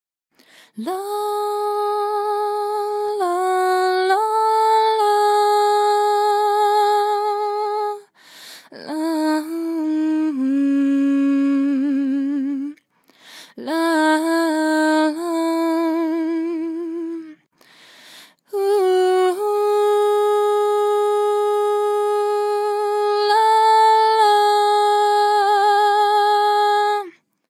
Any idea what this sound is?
Katy Sings LaaOooAaa
Katy, female vocalist singing melisma style. Will sound great with some reverb.
female, singing, ahh, melisma, la, vocal, ooo